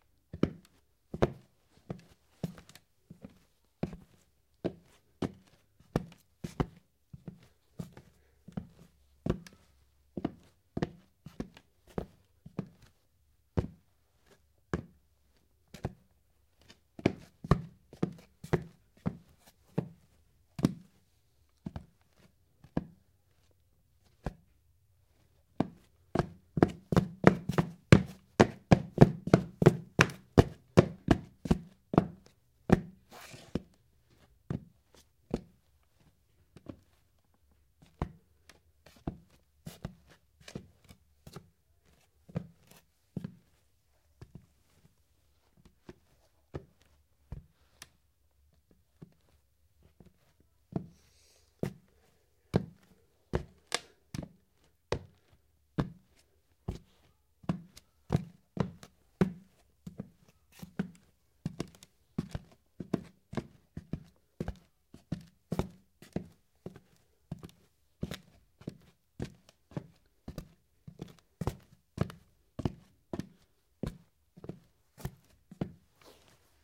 Men's dress shoes walking on hardwood floor.